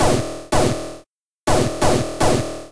KUMCU Gamze 2019 2020 LaserBlaster
I created this sound using Audacity.
To create this laser-blaster sound effect, I generated a sawtooth chirp with a frequency that starts at 1200Hz and ends at 50Hz with an amplitude of 0,7 and a linear interpolation. Then I duplicated my sound and slide it to 0,050 seconds. I cut the first 0,050 seconds of the first track. I mixed the two tracks and then I added a phaser with the following settings:
> stages: 2
> Dry/Wet: 200
> LFO Frequency: 0,7Hz
> LFO start phase: 0
> depth: 70h
> feedback: 0%
> Out Gain: -6dB
Then I generated a white noise with an amplitude of 0,8. I applied a fade-out effect. I duplicated and applied a fade-out to the new track and changed the speed to 111%. I duplicated the track and applied the same effects to the new one (fade-out + changed the speed (111%)). Then I applied a low-pass filter to the first noise with a roll-off of 6dB per octave and a frequency of 50Hz and I amplified the track with an amplification of 24,02 dB.
laser-pistol, star-wars